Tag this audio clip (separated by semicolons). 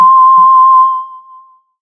multisample; basic-waveform; experimental; reaktor; triangle